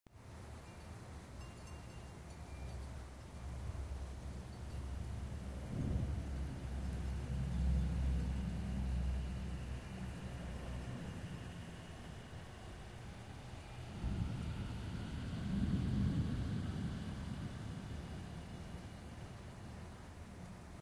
Storm of Doom
Just before a really bad storm. Windchimes blowing in the wind, creepy wind and distant sounds of what sounds like doom.